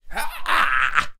A clean human voice sound effect useful for all kind of characters in all kind of games.